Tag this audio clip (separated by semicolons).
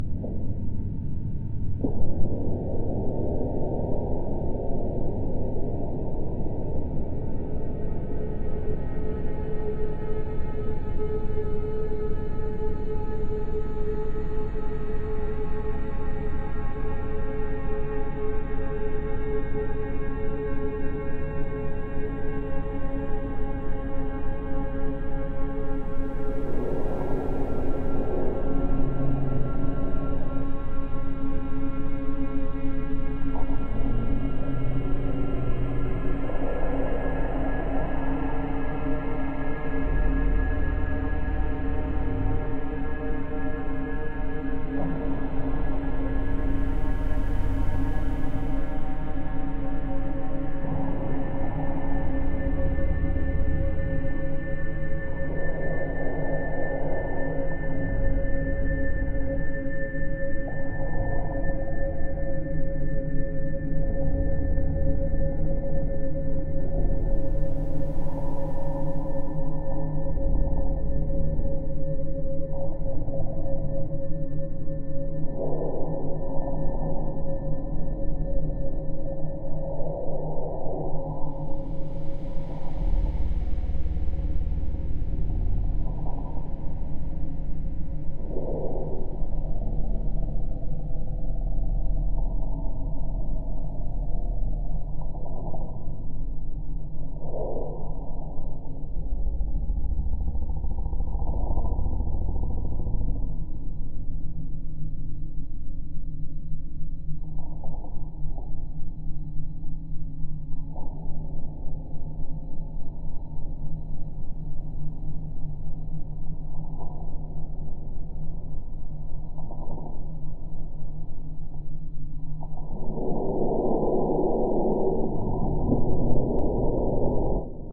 ambience; ambient; atmosphere; creepy; dark; evil; horror; music; scary; sound; spooky